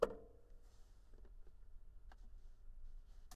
Toy records#99-K10
Complete Toy Piano samples.
Key press or release sounds.
toypiano, instrument, sample, piano, toy